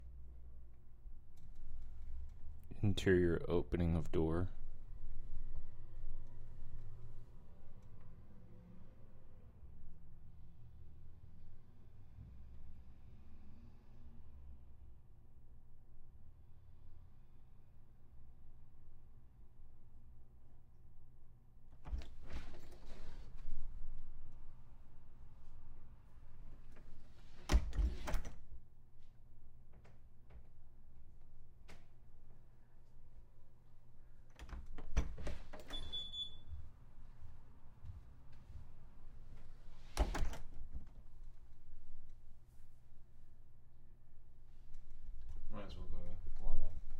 Condo door opens and closes.